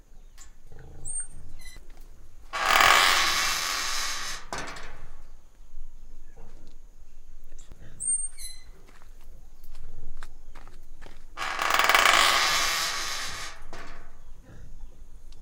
portress recorded on octava